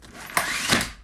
roller shade up
Roller shades released and rolling quickly up.
blind, blinds, roller, shade, up, window